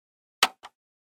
A Simple Button recorded with Zoom H6 In Studio Conditions Check out entire Buttons and levers pack!
game, press, sound, button, plastic, buttons, push, click, sfx, button-click, switch